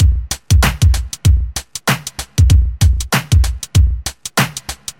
duppyRnB01 96bpm
slow bouncy RnB style beat with claps.
break, breakbeat, loop, beat, rnb, 96bpm, slow, funk, drum